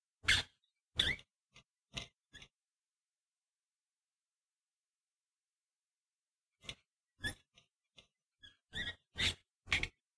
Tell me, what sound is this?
Opening and closing an aluminium bottle cap. Recorded in Samson Go Mic. Post-processed in Audition.
Recorded by Joseph